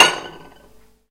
Samples of tools used in the kitchen, recorded in the kitchen with an SM57 into an EMI 62m (Edirol).
hit, kitchen, percussion, porcelain, resonance, unprocessed